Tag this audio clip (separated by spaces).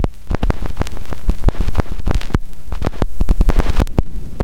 sound-design 2-bars loop glitch rhythmic noise